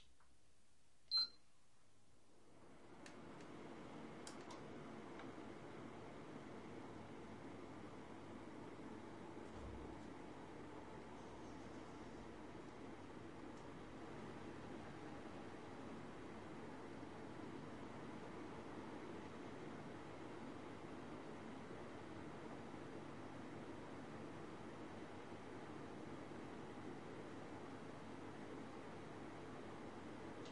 aire acondicionado encendido
air conditioning, turning on... aire acondicionado, refrigeración encendido
acondicionado, air, aire, conditioning, n, refrigeraci